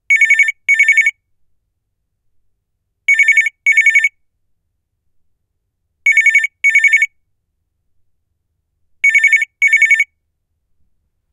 Phone Old Landline Ringing
Old (circa 90s I think) Telecom NZ landline ringing. Recorded with Zoom H4n.